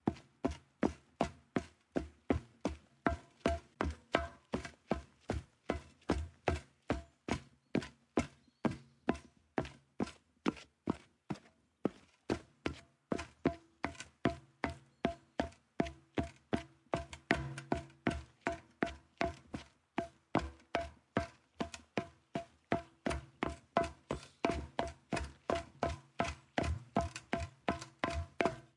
footsteps-metal-surface-01
metal, field-recording